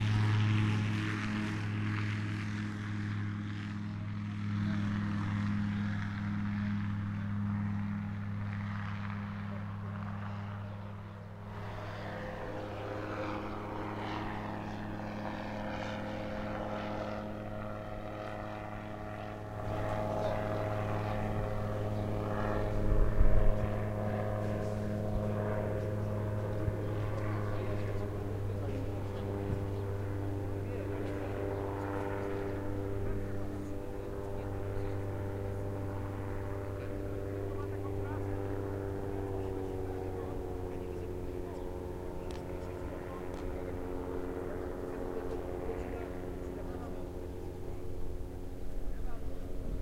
Airplanes are taking off or landing at the airport. Distant people noises.